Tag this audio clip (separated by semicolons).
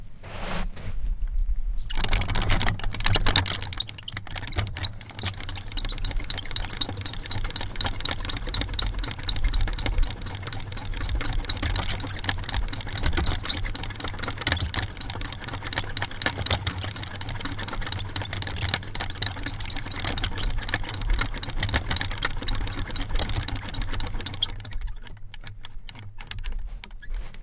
atmosphere; field-recording; mechanic; metallic; soundscape